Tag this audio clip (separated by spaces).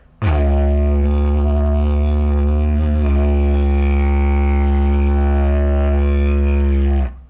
Aboriginal Didgeridoo Indigenous woodwind